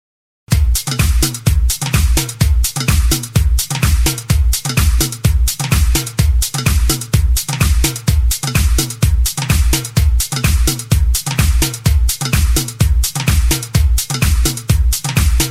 diffrent type of Percussion instrument of darbouka :
ayyoub/darij/fellahi/malfuf/masmudi-kibir/masmudi-sagir/rumba-.../Churchuna/Dabkkah/Daza/